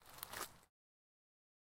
Footstep Gravel
This sound is of someone taking a step on gravel.
Sand, Walking, Gravel, Footstep